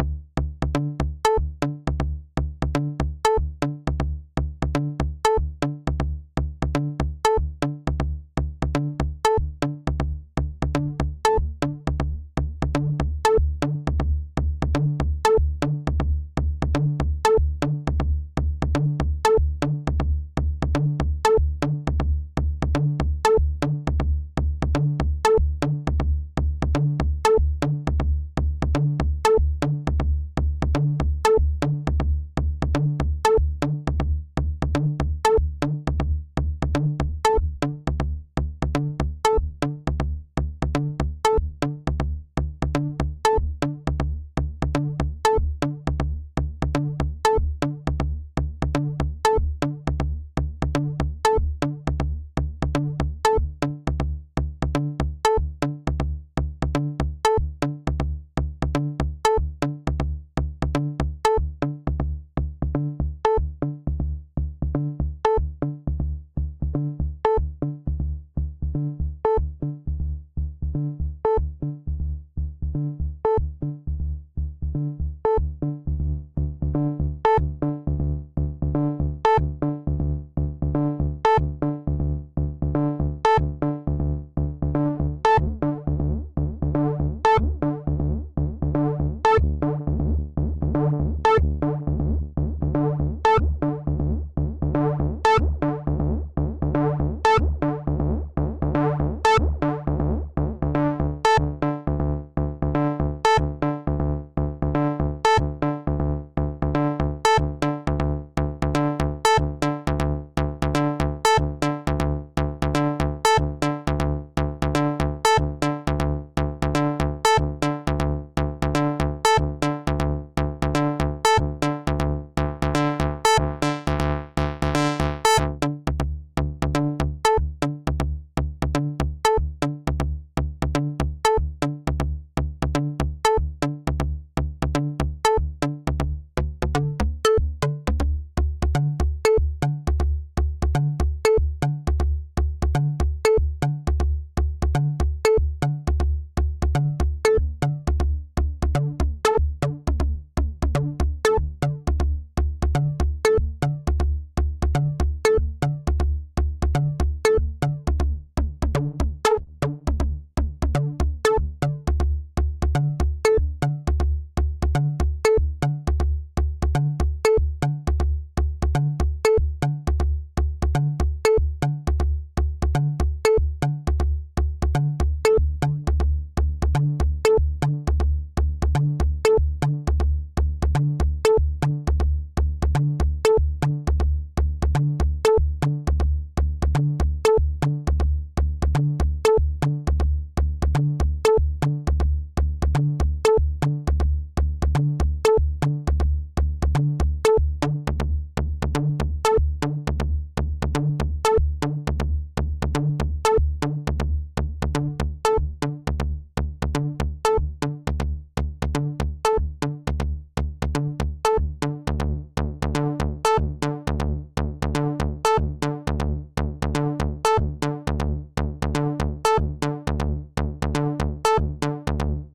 electro bass loop,
massive sound synth,